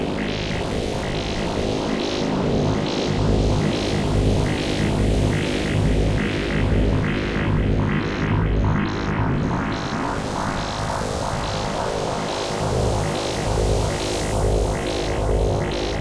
Odd farty synth sounds recorded from a MicroKorg with lots of envelope tweaking. Dynamic, breathy and bubbly sounds.